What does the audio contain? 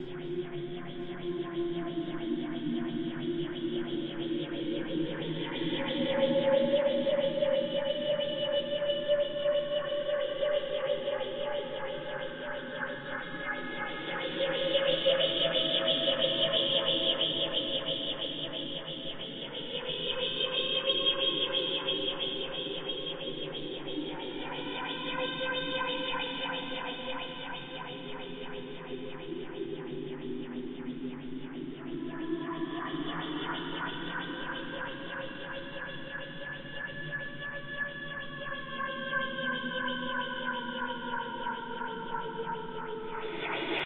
This Sound is called HEE, HEE HEE HA. WOWWW because I said that and made it all weird, its perfect if u want to use it in an alien movie.